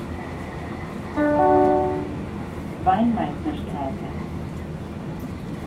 Recorded with Tascam DR-44WL on 19 Nov 2019 Berlin
Recorded from inside train U8